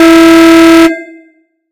Alarm-01-Long
Alarm to use with a loop